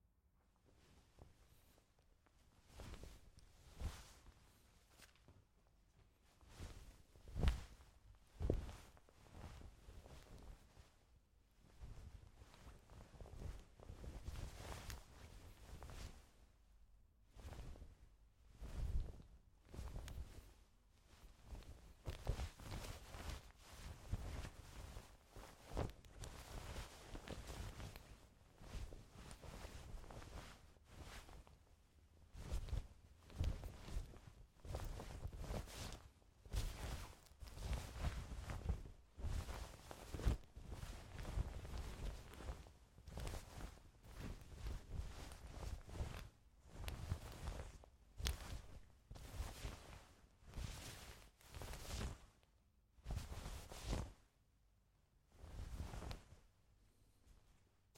heavy fabric dancing
heavy fabric texture movement